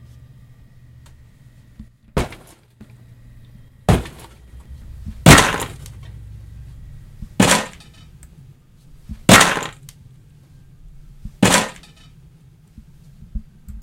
Crashing sound made by kicking a storage container and a tool box.
Slam, Kick, Crash, SFX